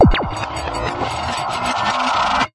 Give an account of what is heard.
The sound of a haunted bathroom.
Created using sampling, field recording, FM synthesis, and physical modeling synthesis.
composite, short